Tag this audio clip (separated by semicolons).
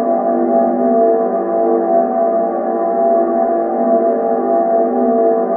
ambient; creepy; dark; film; processed; score; sinister; sitar; soundesign; spooky; suspense; terror